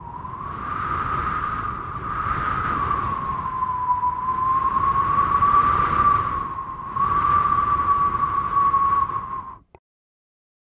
Wind Arctic Storm Breeze-015
Winter is coming and so i created some cold winterbreeze sounds. It's getting cold in here!
Arctic, Breeze, Storm, Wind, Cold, Windy